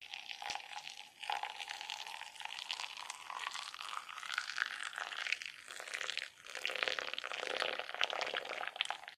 tea poured in cup vers3 record20151218235204
water pouring into tea cup. Recorded with Jiayu G4 for my film school projects. Location - Russia.
cup, cups, teacups